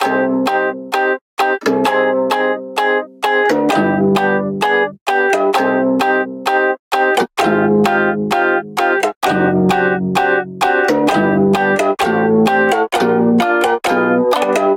FLEX Melody
Melody Madded in flex as well u can use free
flstudio, free, loops, music